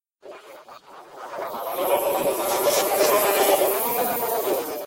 space fart
A highly distorted flatulation sound done with the mouth (mouth fart)
distorted, human, flatulation